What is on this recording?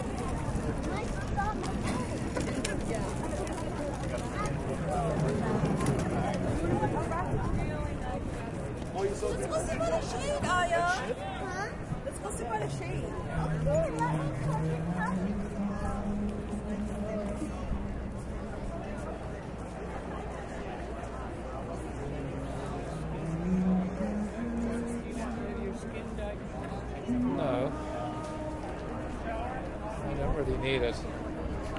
Luminato food festival John St Toronto 19 Jun 2011
A field recording made with a Roland R05 sound recorder and RS5 stereo microphone at an event in Toronto's Luminato festival 19 Jun 2011. This was a street event where a section of John Street was closed to traffic and became a food market.
canada, street-festivals, luminato, toronto